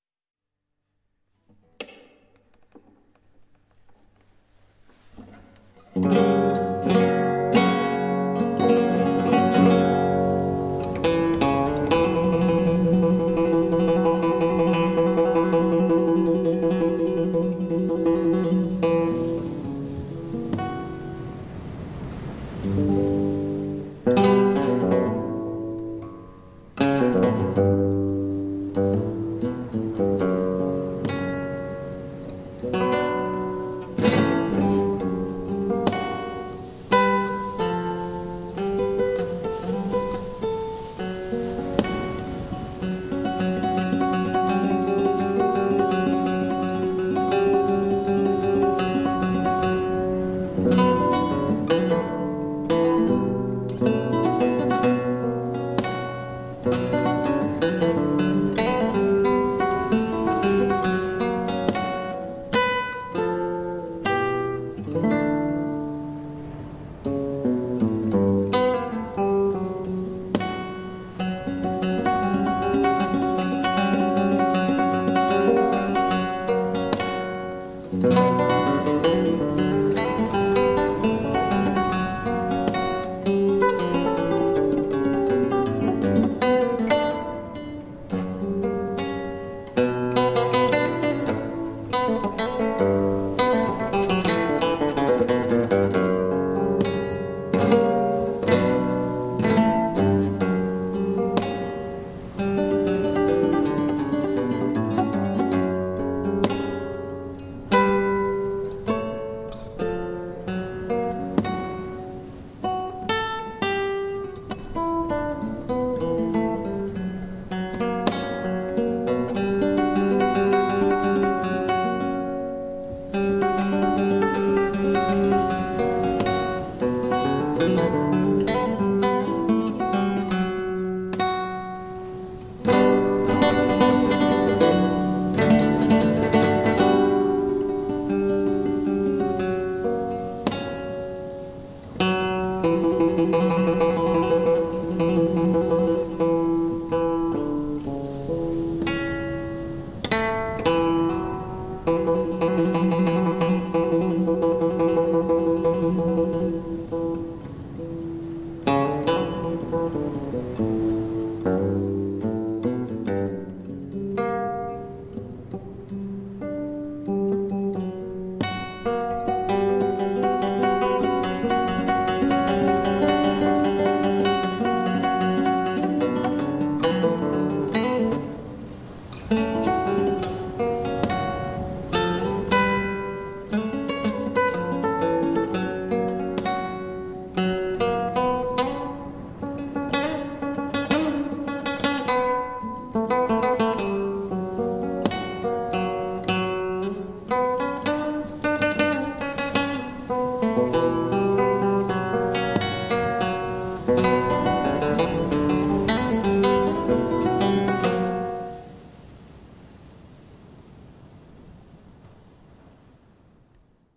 Solea cantábrica
Improvisación con guitarra clásica por soleá y el mar cantábrico de fondo.